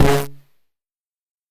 A synthesized horn or trumpet blast being distorted by a modified "My First Pianola" electronic children's toy. The note was originally C